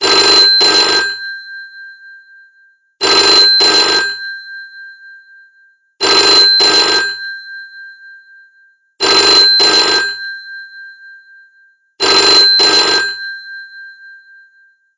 Edited in Audacity to be fully loopable. The different versions of this sound are of varying lengths.